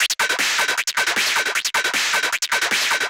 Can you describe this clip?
crazy loop
percussion loop processed with camel phat